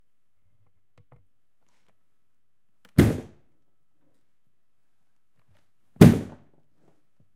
Book cover hit
Recorded with Zoom H4N, built-in microphones at 120°
hit, cover, Book